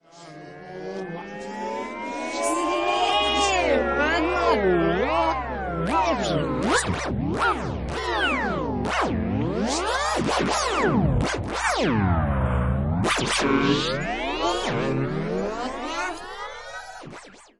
casio toy keyboard
keyboard, toy